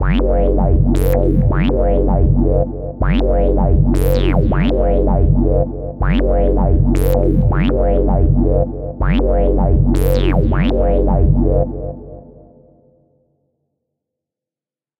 DnBbassline160bpm3
Dark, acidic drum & bass bassline variations with beats at 160BPM
dnb, acid, dark, beat, 160bpm, bassline, lfo